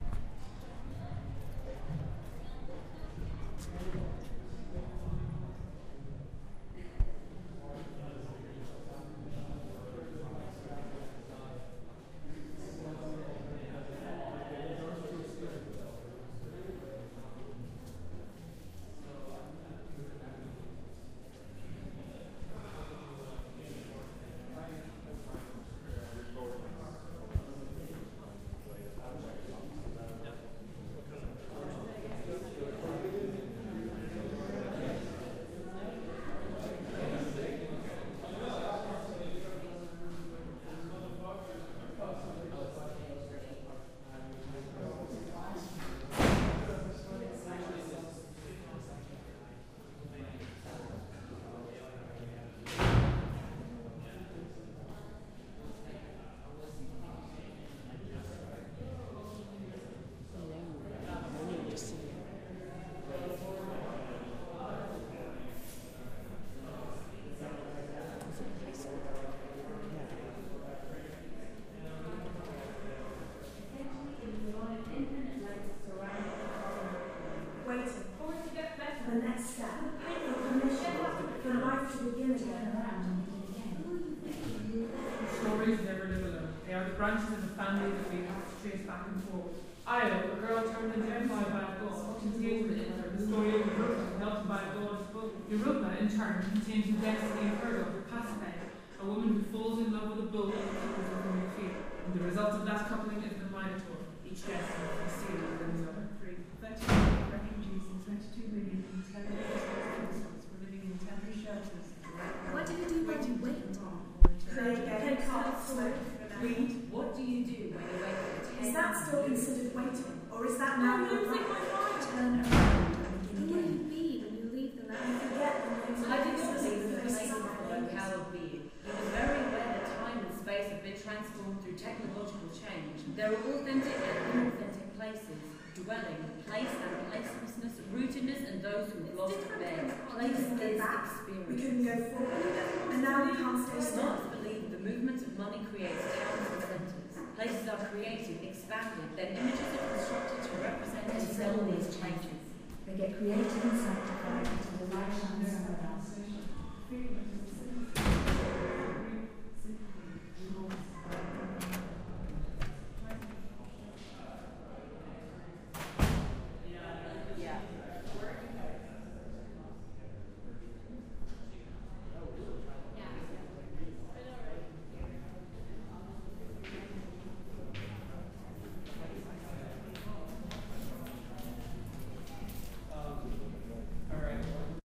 This is a part of a set of 17 recordings that document SoundWalk 2007, an Audio Art Installation in Long Beach, California. Part of the beauty of the SoundWalk was how the sounds from the pieces merged with the sounds of the city: chatter, traffic, etc. This section of the recording features pieces by: Autumn Hays; Adam Overton; Tristan Shone; James N. Orsher; Noah Thomas; Braden Diotte: GirlCharlie & Monica Ryan
sound-installation, sound-art, soundwalk-2007, long-beach